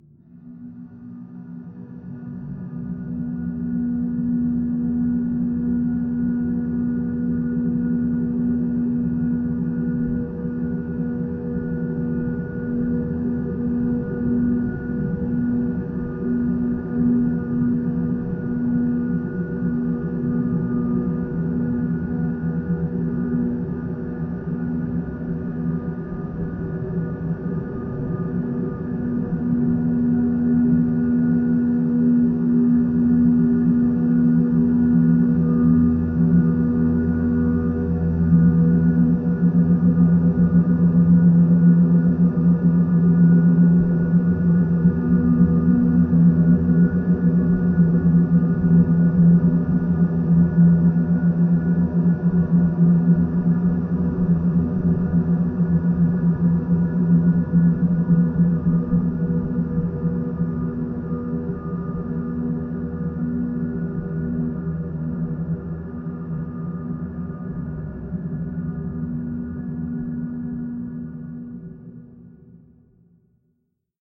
LAYERS 023 - Industrial drone-73
I took for this sound 4 different machine sounds: a wood milling machine, a heavy bulldozer sound, a drilling machine and some heavy beating sounds with a hammer. I convoluted the four sounds to create one single drone of over one minute long. I placed this sound within Kontakt 4 and used the time machine 2 mode to pitch the sound and there you have the Industrial drone layer sound. A mellow drone like soundscape... suitable as background noise. Created within Cubase 5.
drone, industrial, soundscape, background, multisample